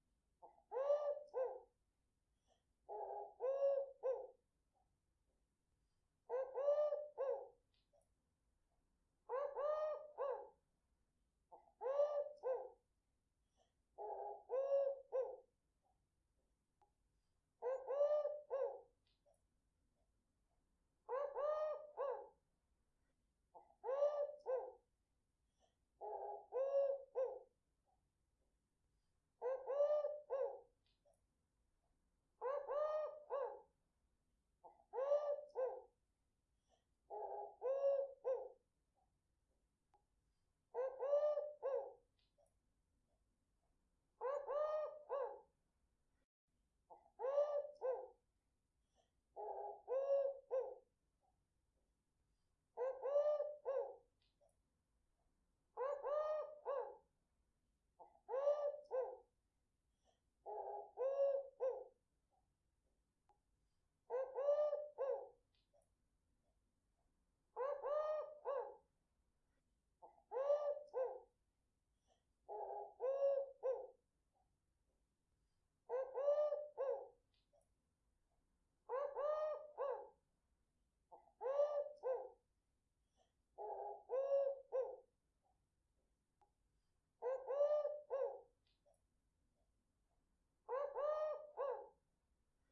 bird birds cooing dove nature pigeon Dove Callling
bird, birds, cooing, dove, nature, pigeon